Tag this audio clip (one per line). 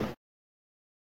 moving
movement
bushes